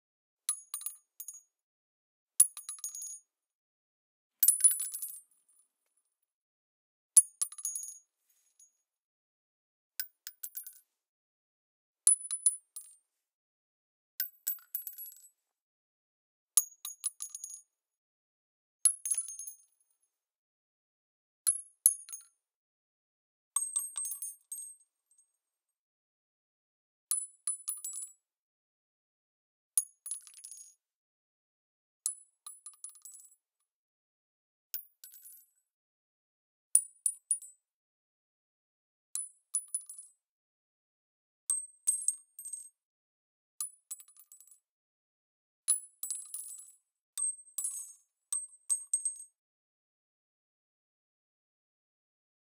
bullet shells falling on the floor
Several sounds of bullet shells dropping on concrete floor
bullet, casing, drop, falling, gun, shell, weapon